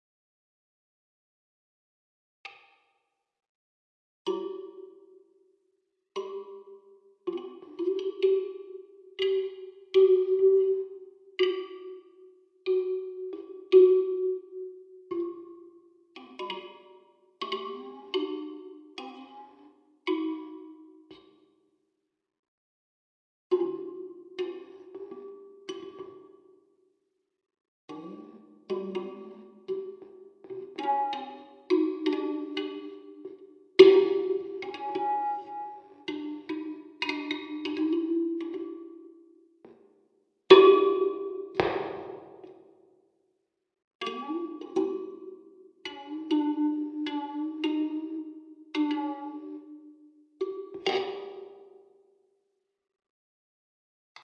Sound was picked up by a contact mic clamped to the plastic box and put through a small amplifier.Mostly plucked sounds, but also some oddities in there where I have used pens or similar objects to scrape, and hit the elastic.